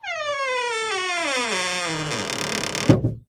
Door-Wooden-Squeak-0007
This is the sound of a common household door squeaking as it is being opened or closed.
This file has been normalized and most of the background noise removed. No other processing has been done.
Wooden, Squeak